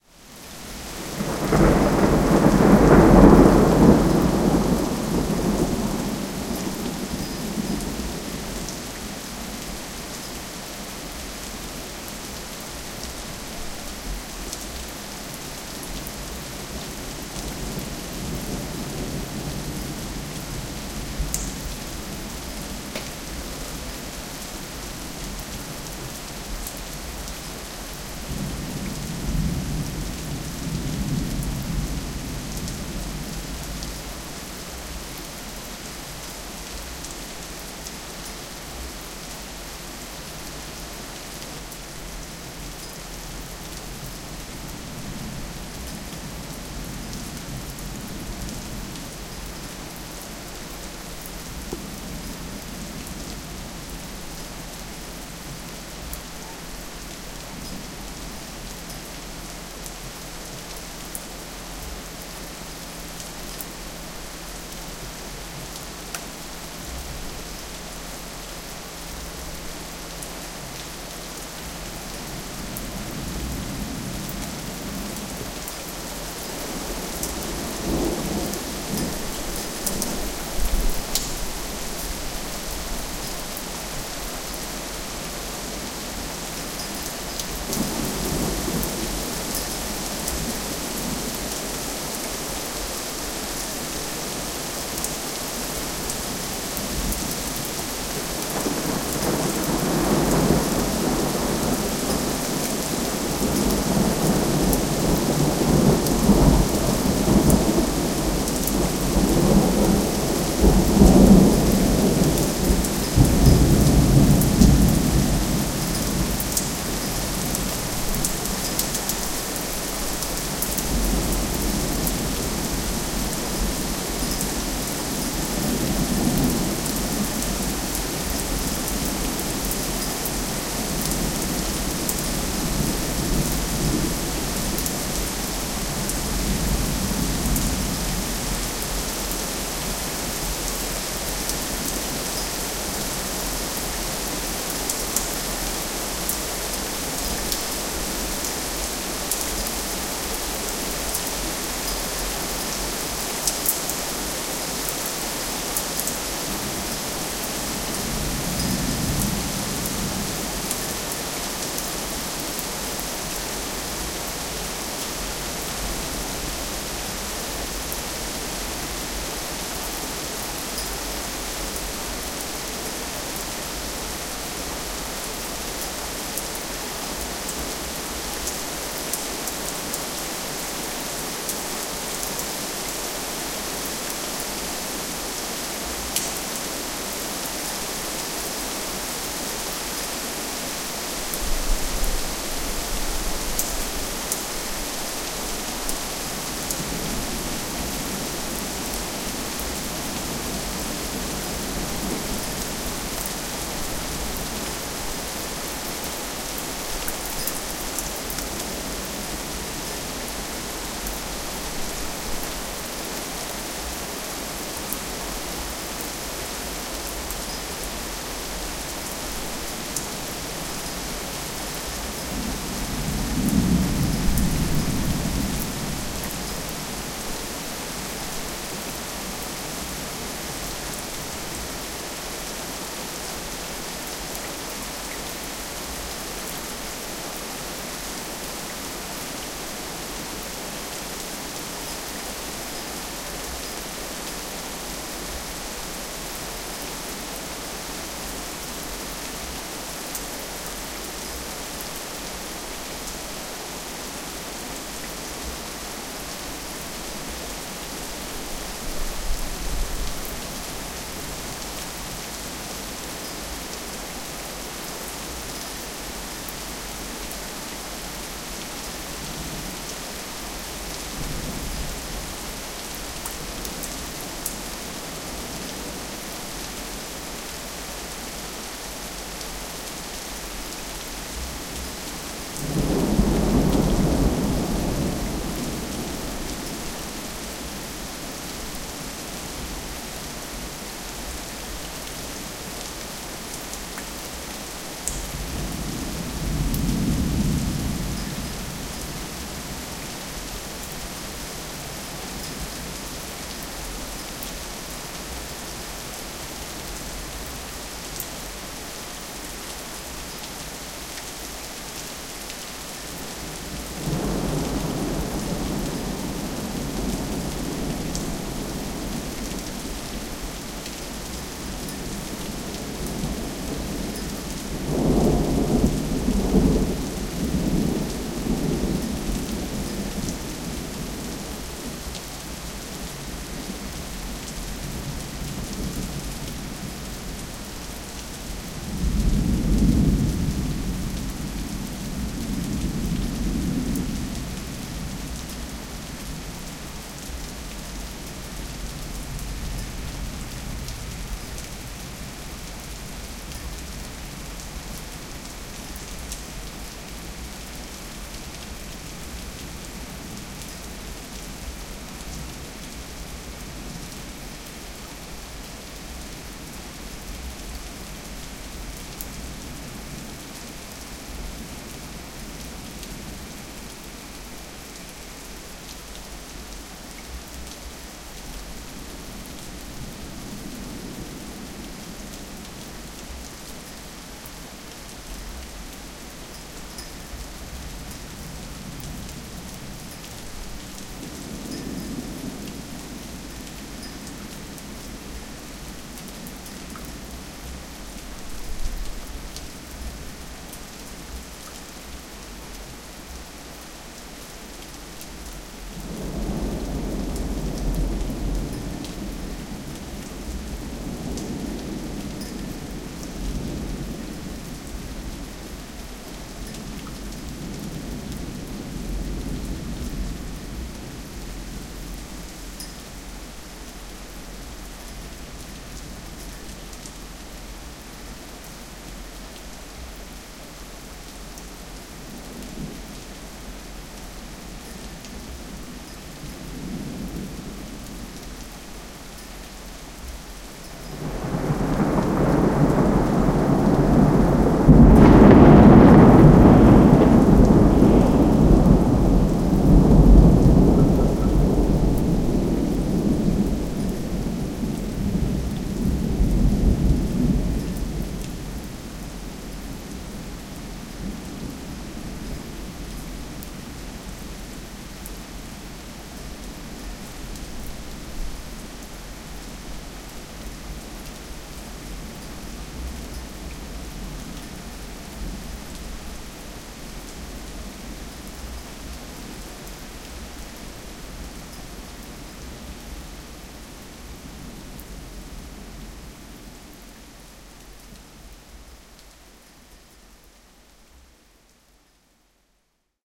Spring Night Rain2 - (Evosmos - Salonika) 03:15 15.05.12
Thunderstorm and heavy rain again. I recorded this rain sample at 3 in the morning from the balcony of my house.
Using the Adobe Audition 5.5, I increased >7050 Hz freq. region and I added, 60% Reverb, 20% Exciter, 30% Widener, 20% Loudness Maximizer from the "Mastering Effect".
Storm, Environment, Thunder, Ambient, Relax, Night, Rain, Water